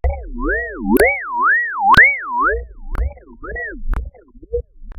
a wavy whirly sound
odd, wavy, curly, unusual, whirly, scifi, weird, surreal, whirl, trippy